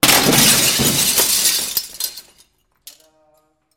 glass being broken with various objects.
break, breaking-glass, indoor, window